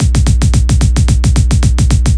A simple Trancy Drumroll, use with the other in my "Misc Beat Pack" in order from one to eleven to create a speeding up drumroll for intros.